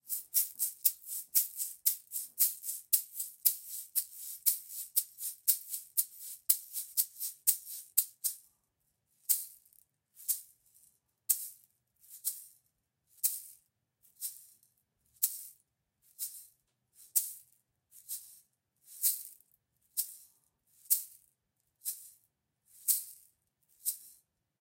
Salsa Eggs - Green Egg (raw)
These are unedited multihit rhythm eggs, and unfortunately the recording is a tad noisy.
latin, multi-hit, percussion